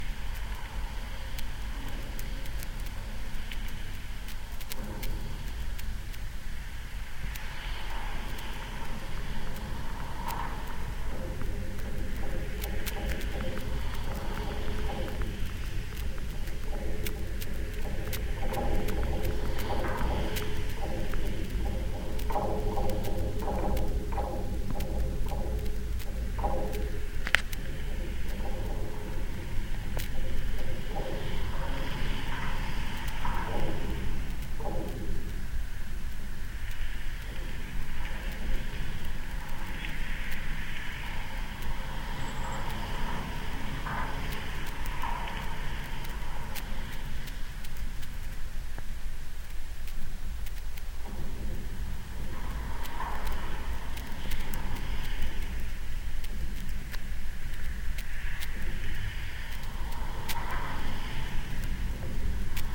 GGB suspender SE12SW
Contact mic recording of the Golden Gate Bridge in San Francisco, CA, USA at southeast suspender cluster #12. Recorded December 18, 2008 using a Sony PCM-D50 recorder with hand-held Fishman V100 piezo pickup and violin bridge.
cable, sample, Golden-Gate-Bridge, V100, contact-microphone, wikiGong, sony-pcm-d50, piezo, Fishman